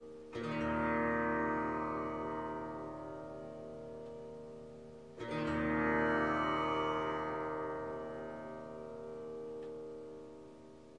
Tanpura Mournful Strumming
Snippets from recordings of me playing the tanpura.
Tuned to E flat, the notes from top to bottom are B flat, E flat, C, low E flat.
In traditional Indian tuning the root note in the scale is referred to as Sa and is E flat in this scale The fifth note (B flat in this scale) is referred to as Pa and the sixth note (C) is Dha
I noticed that my first pack of tanpura samples has a bit of fuzzy white noise so in this pack I have equalized - I reduced all the very high frequencies which got rid of most of the white noise without affecting the low frequency sounds of the tanpura itself.
Please note this is the tanpura part of an instrument called the Swar Sangam which combines the Swarmandal (Indian Harp) and the Tanpura, it is not a traditional tanpura and does sound slightly different.
ethnic, bass